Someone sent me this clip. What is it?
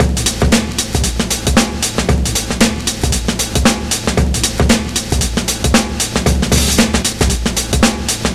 Dr.Rex Beat 115bpm
dusty, 115bpm, vinyl, drums, groovy, dirty, rhythm, bouncy, loopable, loop, drum-loop, breakbeat